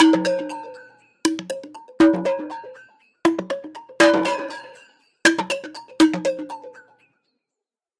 Pringle rhythm - Bird Twirl
hitting a Pringles Can + FX